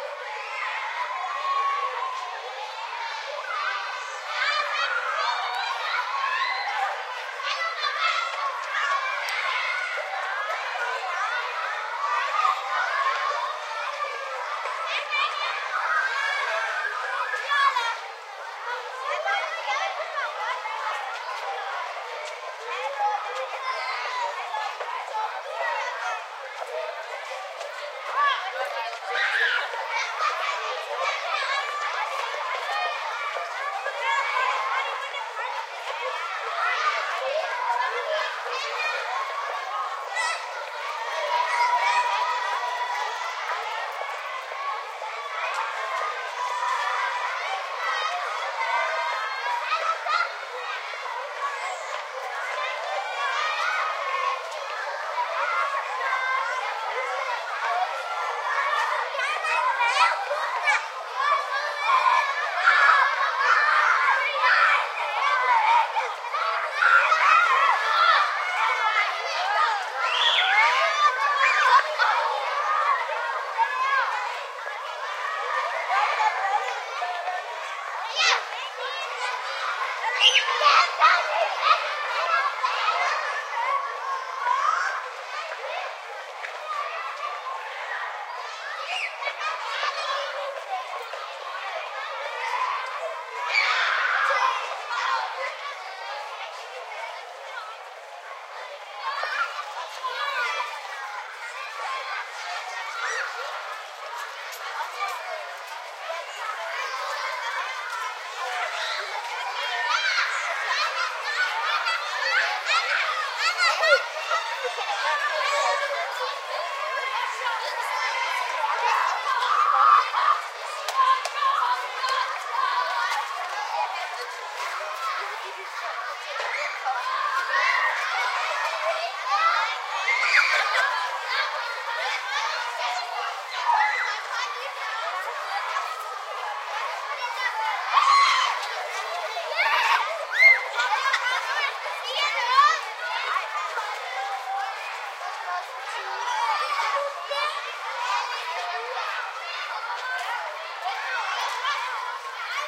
This is a recording from a playground. Sound from a crowd of children playing, making noise, talking and yelling.
playground, public-school